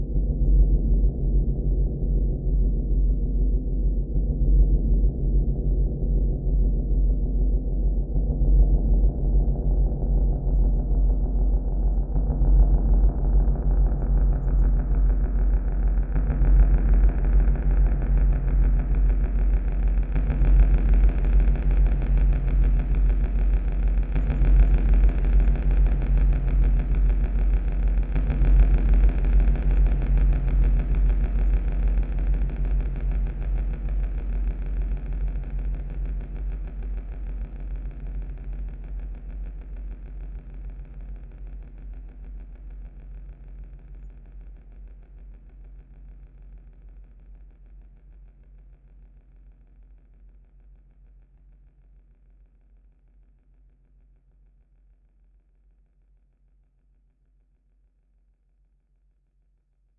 Synth Noise
delay, effect, electronic, fx, glitch-hop, new, reverb, soundesign, stereo